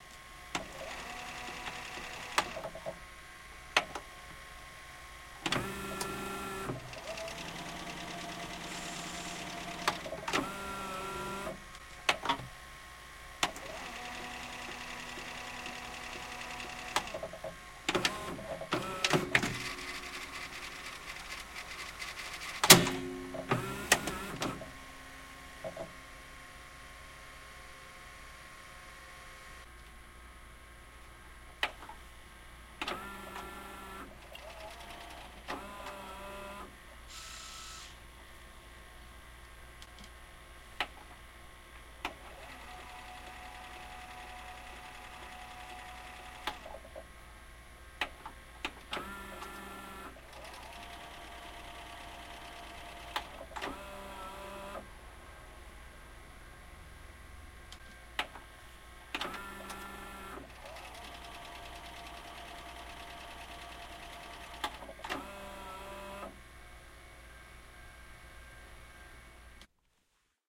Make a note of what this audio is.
Various mechanical clicks and whirrs from playing, rewinding VHS video tape player (2005). MiniDisc recorder with Sony ECM-DS70P.